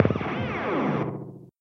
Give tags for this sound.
sci-fi amplifier alien amp-modelling virtual-amp laser

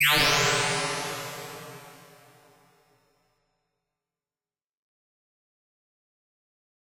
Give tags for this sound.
laser machine reverb

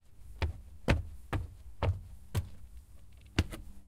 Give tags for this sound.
wood-stairs walk feet porch outdoors floor walking wood stairs wooden-stairs wooden outside footsteps

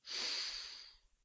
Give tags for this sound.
foley nose smell sniff sniffing

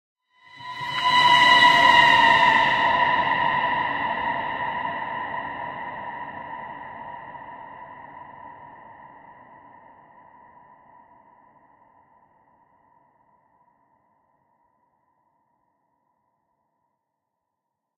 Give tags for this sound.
Ghost Horror Scary Scream

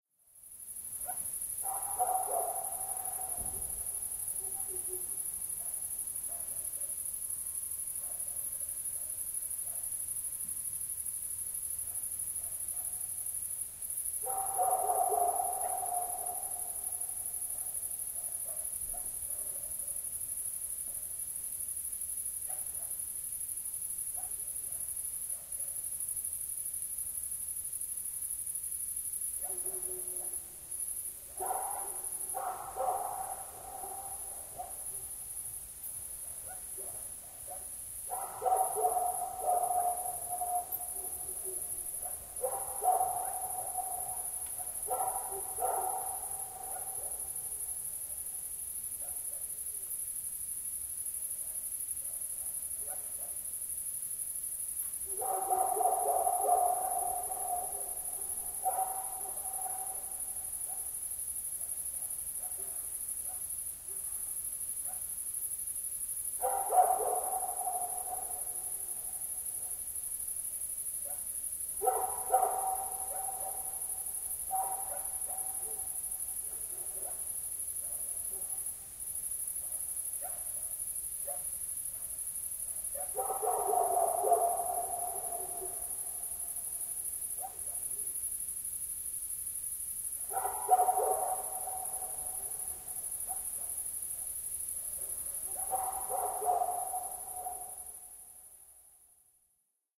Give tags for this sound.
ambiance background-sound field-recording crickets dog barking night general-noise

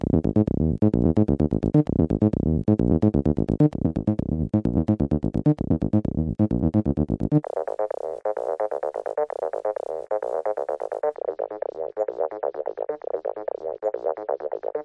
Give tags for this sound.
acid bassline dance effect electronic fx house loop rave retro reverb squarewave synth techno

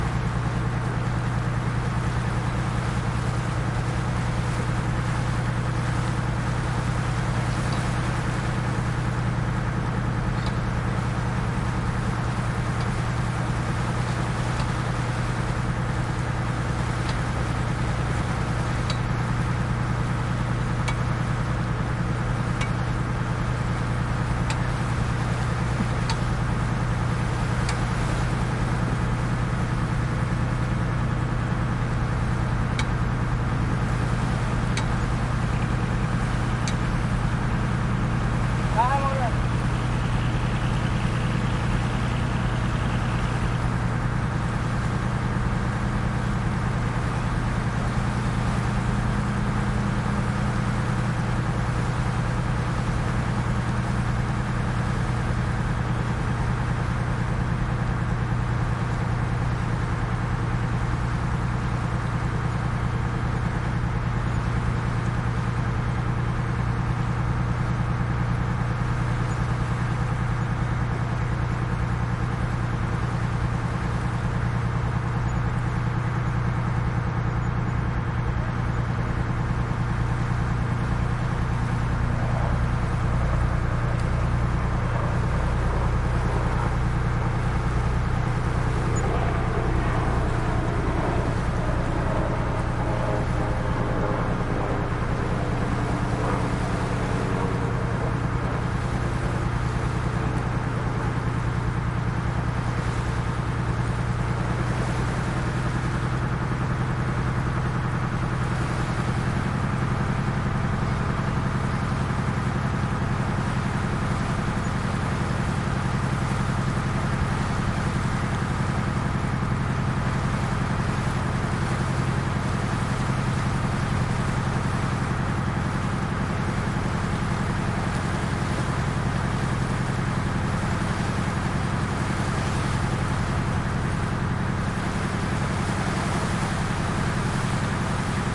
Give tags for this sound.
boat engine fishing India medium onboard speed splash waves

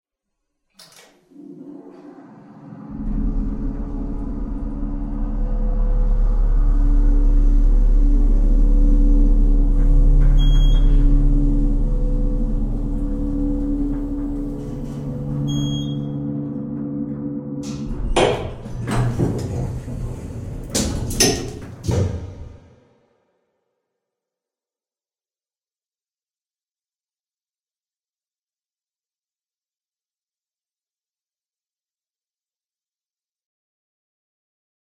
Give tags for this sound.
elevator motor

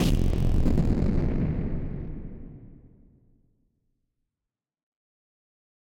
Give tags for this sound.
fx harsh

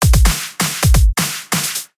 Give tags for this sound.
FL-Studio-12 bpm

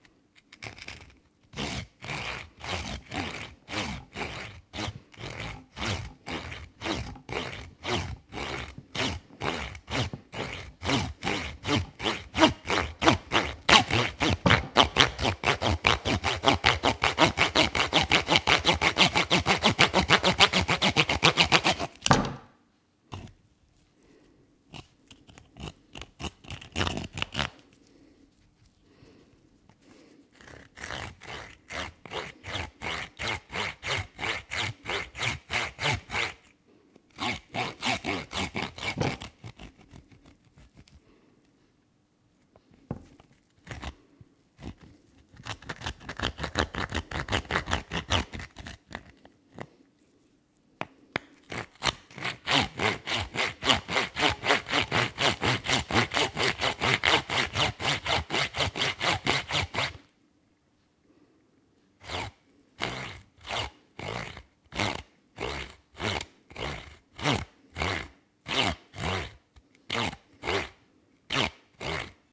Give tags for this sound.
blood bone bones brain break brutal butcher butchers effects flesh fx ge gore gross horror horror-effects hueso knochens os s saw sawing scare scie sierra splat squish tear zombie

